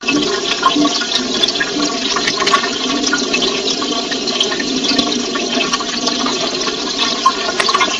running water in a tank